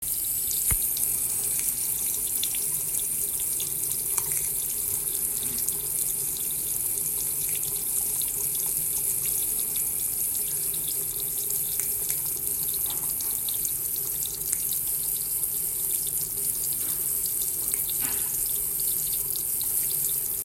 A faucet running water
Water, faucet, running-water